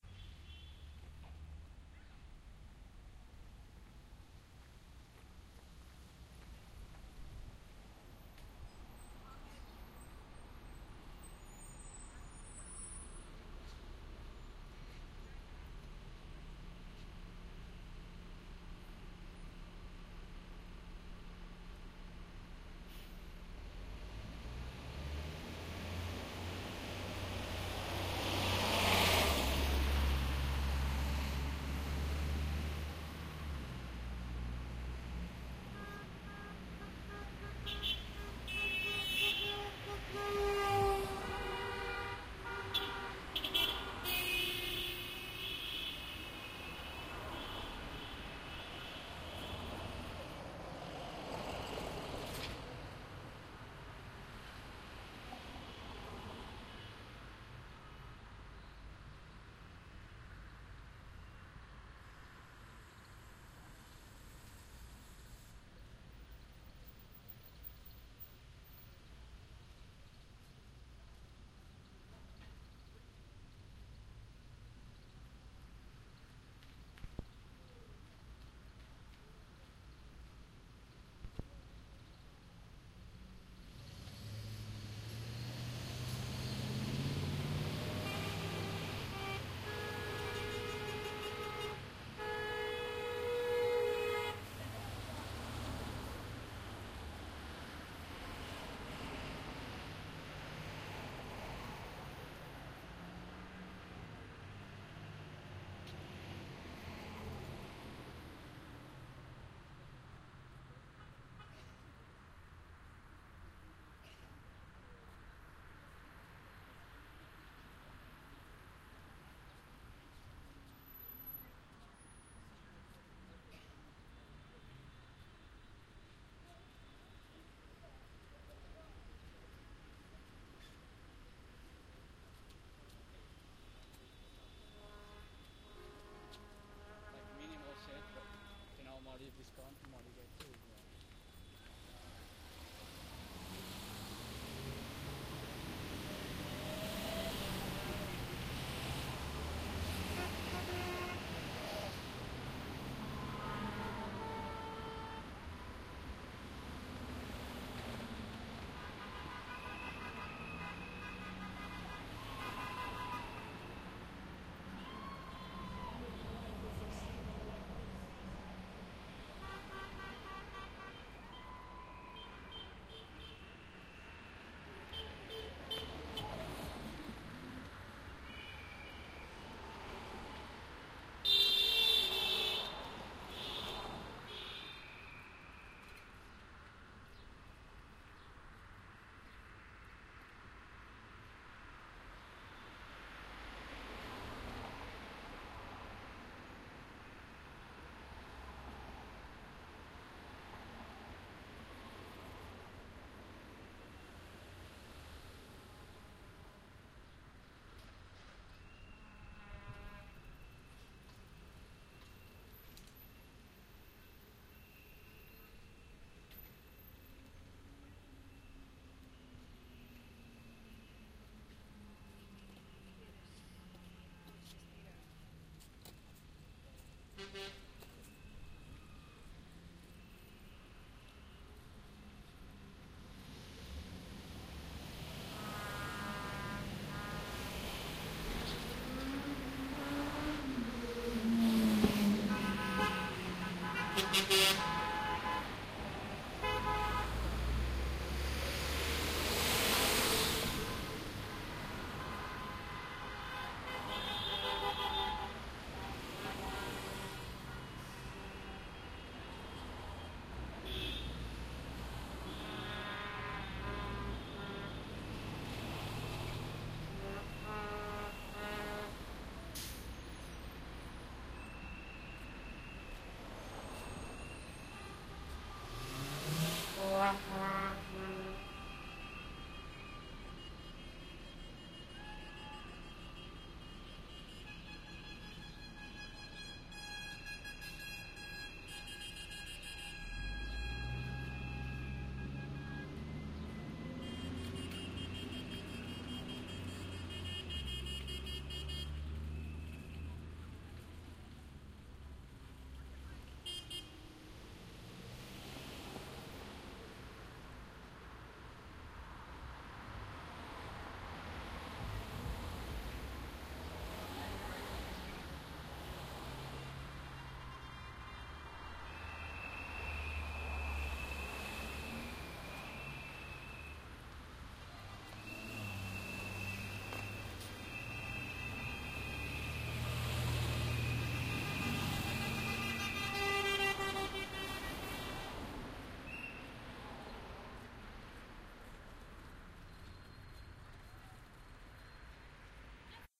062110 honking traffic
Stereo binaural recording of cars passing and honking their horns madly over their team winning one of the FIFA matches. No talking.
binaural, cars, cup, fifa, happy, honk, honking, people, stereo, traffic, world